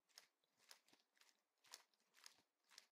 sound of a distressed cat pacing on grass.